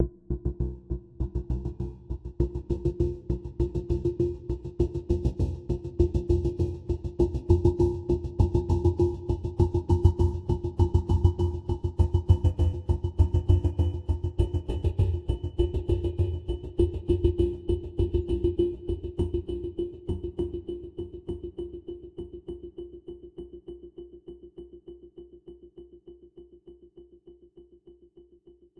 subtle background w17gki
A muted bassy rhythm slowly processed with phaser. It may be useful as a subtle background for a scene in a movie.
100bpm, background-beat, bassy, beat, dark, deep, echoing, fade, fading, loop, monotone, monotonous, moving, muffled, muted, phaser, subtle